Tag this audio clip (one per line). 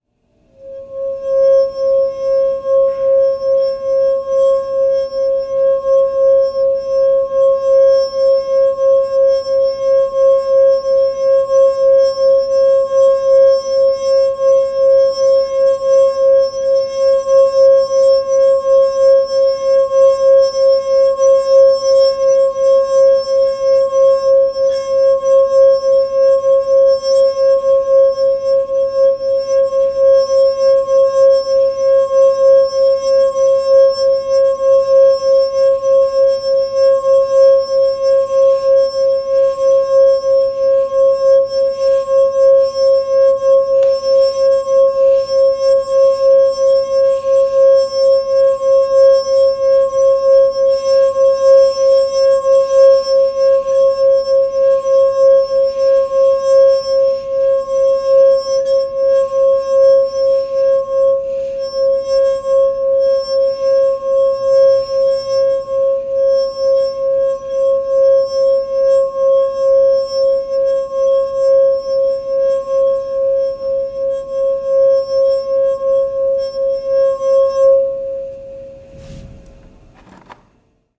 00-M002-s14
rim
rub
water
wineglass